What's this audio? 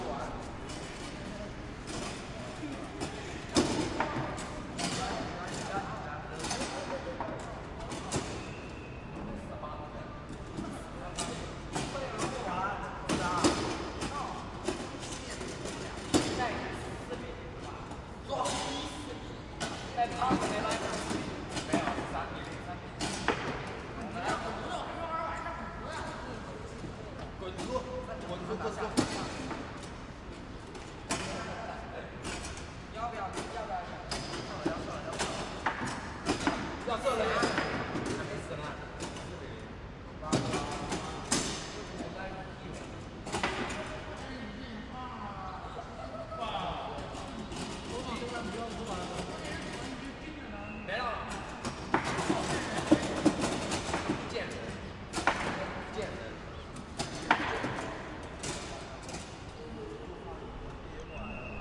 Ambiente de jogo de pebolim no Colégio São Bento, take 01
Ambiente de pebolim em uma das quadras cobertas do Colégio São Bento, São Paulo, take 01, canais 01 e 02.
pebolim, colegio